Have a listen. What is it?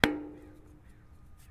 Metal coated tree root 1
Metal coated tree with mallet and stick samples, recorded from physical portable recorder
The meadow, San Francisco 2020
metal metallic resonant percussive hit percussion drum tree field-recording industrial impact high-quality city
field-recording, hit, industrial, tree